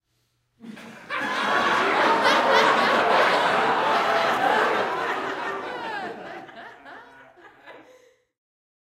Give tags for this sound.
laughing; adults; laughter; laugh; audience; group; crowd; auditorium

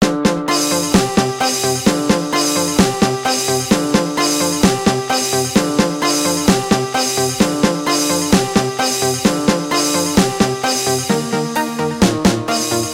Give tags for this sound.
prism; vlogger-music; vlogging-music; electronic-music; free-music; vlog; download-music; syntheticbiocybertechnology; free-music-to-use; music-for-videos; audio-library; vlog-music; free-vlogging-music; sbt; music-for-vlog; loops; download-background-music; background-music; free-music-download; music-loops; download-free-music; music

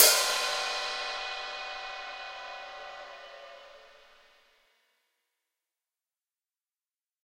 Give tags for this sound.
custom; cymbal; drums; hi-hat; hihat; percussion; skiba

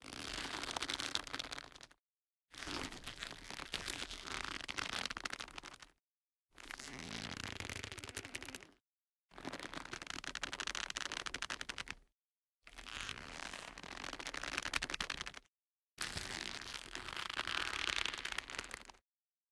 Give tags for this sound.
mono; creak; tension; stretching; cracking; stretch; crack; stress; rodeNTG4; leather; foley; creaking

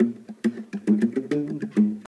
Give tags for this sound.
guitar; muted; arab; riff; loop

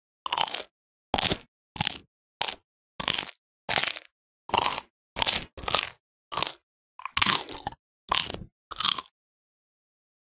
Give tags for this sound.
bite; chew; chewing; chomp; crunch; crunching; eat; eating; food; mouth; munch; munching; pizza; teeth

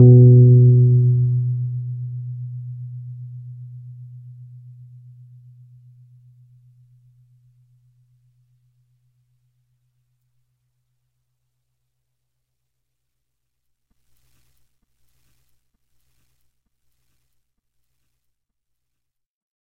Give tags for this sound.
keyboard; electric; rhodes; piano; tube; fender; multisample; tine

